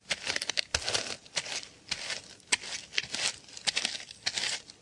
mco walk e01
Footsteps...
NOTE:
These are no field recordings but HANDMADE walking sounds in different speeds and manners intended for game creation. Most of them you can loop. They are recorded as dry as possible so you should add the ambience you like.
HOW TO MAKE THESE:
1. First empty two bottles of the famous spanish brandy Lepanto.
2. Keep the korks - they have a very special sound different from the korks of wine bottles.
3. Then, if you're still able to hit (maybe you shouldn't drink the brandy alone and at once), fill things in a flat bowl or a plate - f. e. pepper grains or salt.
4. Step the korks in the bowl and record it. You may also - as I did - step the korks on other things like a ventilator.
5. Compress the sounds hard but limit them to -4 db (as they sound not naturally if they are to loud).